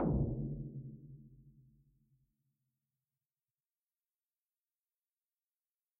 222065 Tom RoomHighReso 11
One of several versionos of a tom drum created using a portion of this sound
which was processed in Reason: EQ, filter and then a room reverb with a small size and very high duration to simulate a tom drum resonating after being struck.
I left the sounds very long, so that people can trim them to taste - it is easier to make them shorter than it would be to make them longer.
All the sounds in this pack with a name containing "Tom_RoomHighReso" were created in the same way, just with different settings.
dare-26, drum, image-to-sound, processed, Reason, tom, tom-drum